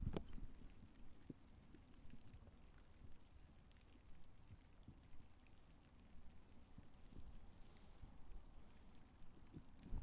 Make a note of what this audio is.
Rainy morning, in Ireland, on my way to college.